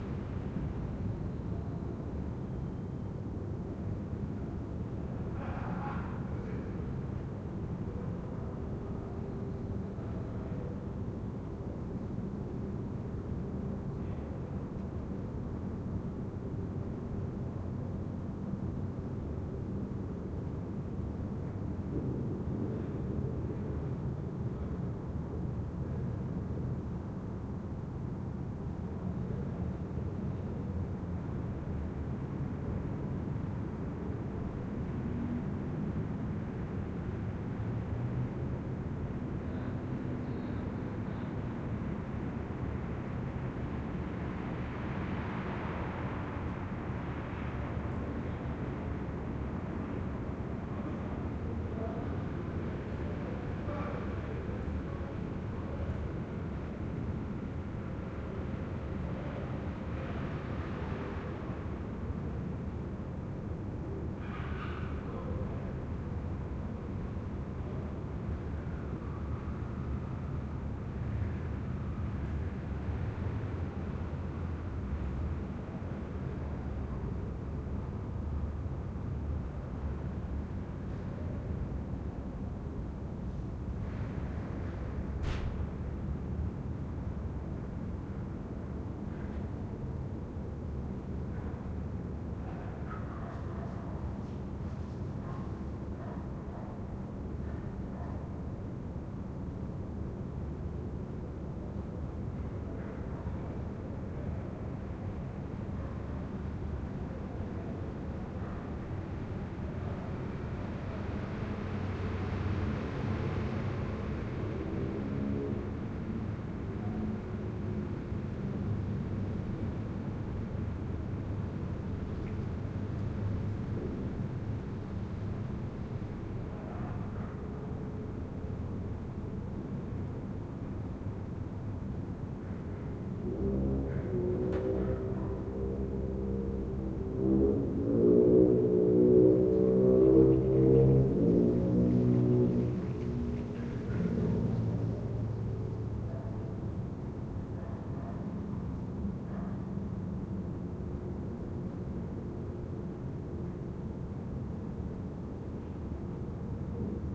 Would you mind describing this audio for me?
City Terrace Night 3
Sound from a city rooftop. Recorded stereo with AB Omni mics.
ambience, field-recording, street, city, urban, rooftop, noise